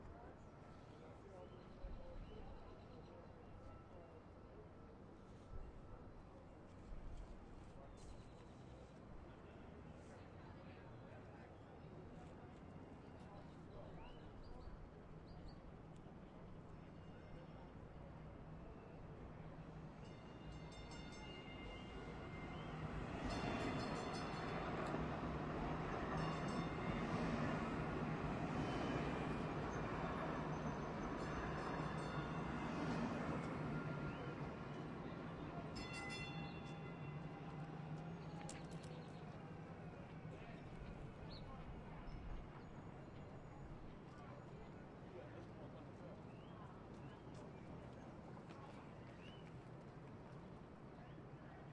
Part of the Dallas/Toulon Soundscape Exchange Project
Date: 4-5-2011
Location: Dallas, West End Station
Temporal Density: 4
Polyphonic Density: 4
Busyness: 3
Chaos: 4